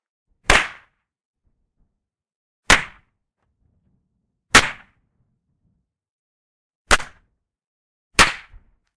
These are bangs I made from smashing a hammer on a roll of caps. I was going to use them to make explosions, but because they didn't work well for that, I decided to label them 'slaps' and I do think they sound like slaps, even if a bit stereotypical!